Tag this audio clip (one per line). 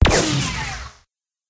lazer
sci-fi
weapons